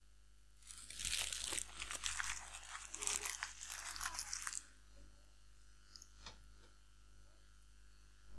Curls up paper to a ball, and throws it away.